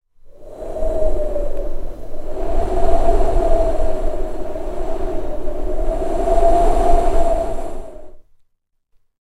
wind by human, blowing near the microphone (Behringer B1)